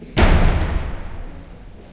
20101024.door.slam
door slam. Shure WL183 and Olympus LS10 recorder. Recorded at church of San Pablo and San Pedro, Granada, Spain
field-recording, bang, door, slam